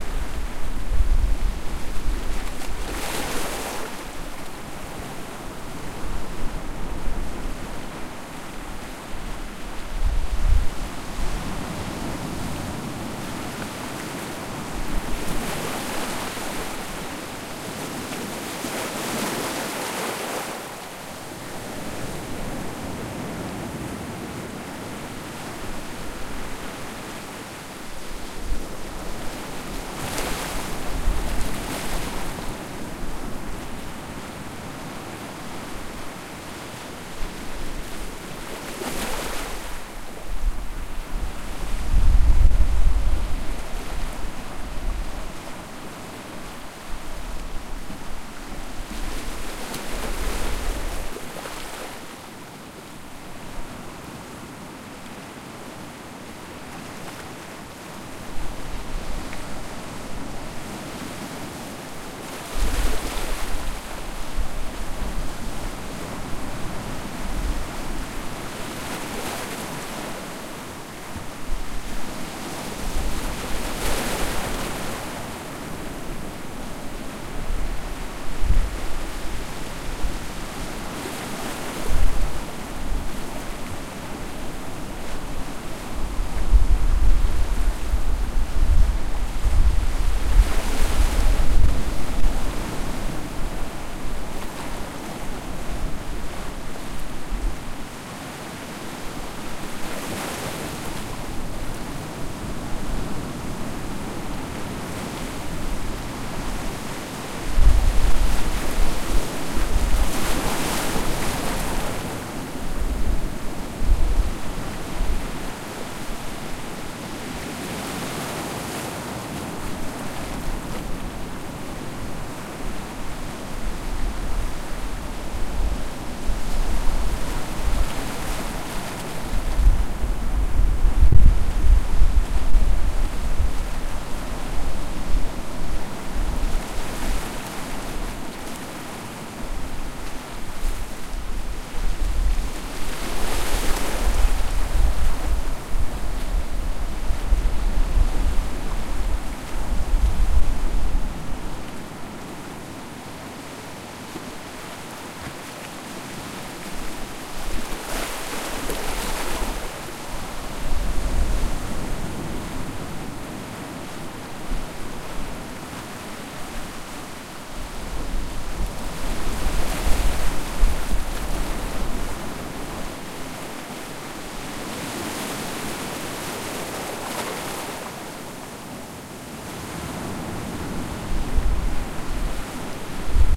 Waves at Yaquina Bay in Oregon. Slight wind.